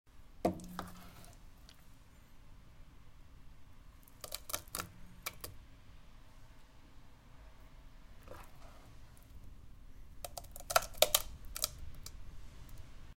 soup dripping into pot
The sound of potatoe soup being scooped up and dripping back into the pot.
I made this because I've searched for the sound of vomit hitting the floor for quite some time now and didn't find anything suitable.
Sorry for the background noise, I made this in my kitchen since I don't have a studio at hand.